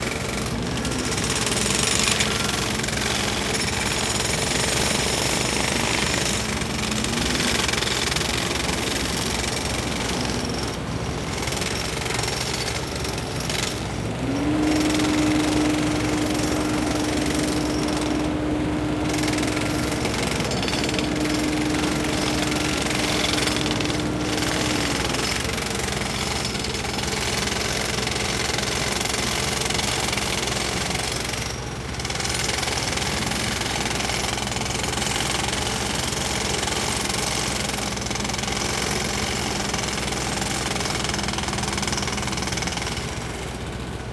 air hammer half close
roadworks taken from half close.